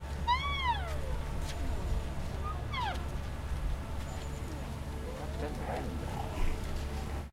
Baby Rhino
Baby southern white rhino mews/cries/makes noises.
Dublin Zoo 2018